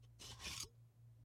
Martini Shaker Cap Off FF335
Pulling cap off martini shaker, quieter
cap, off